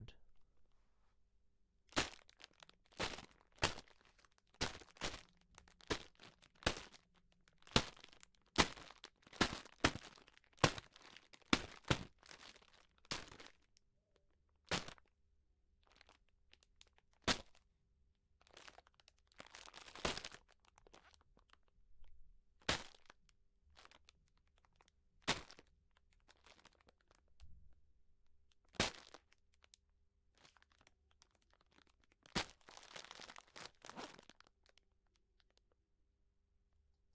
Stabbing sound
This sound I recorded by Punching a bad full of lettuce. I put a whole lettuce in a plastic packet and then I punched the bag over and over , until all the lettuce was broken , therefore creating punching foley. Furthermore I added more low tones in editing so that it sound more like punching a human being. Rather than hearing thew packet crinkle while you punch it.
Wound-Crunch, Stab, someone-Stab, Stabbing